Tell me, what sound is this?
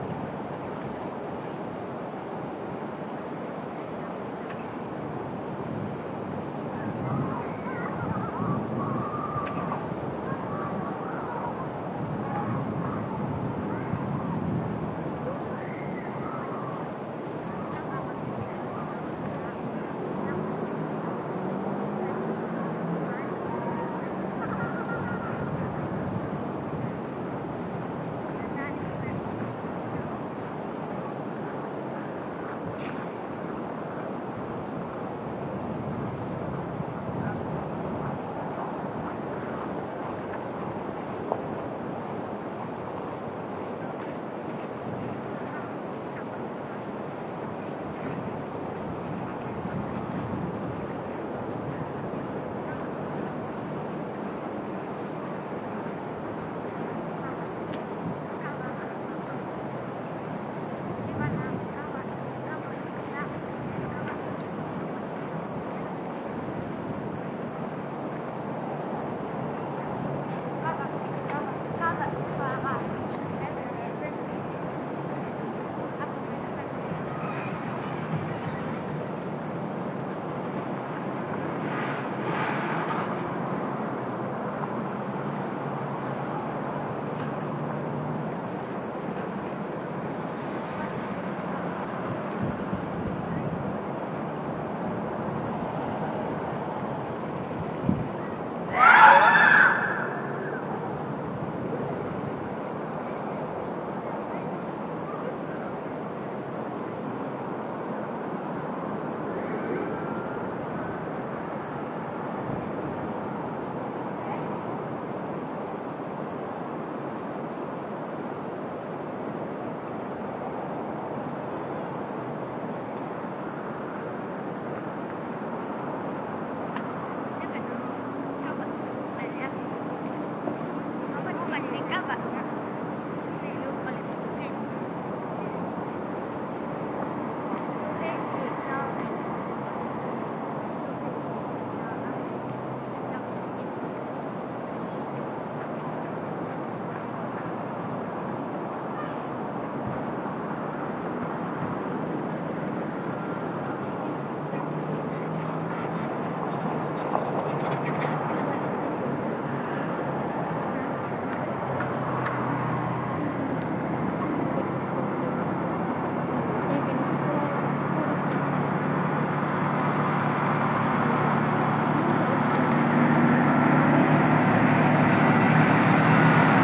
village winter ambience night air tone cold with distant voices Quaqtaq, Nunavik
air, night, tone, winter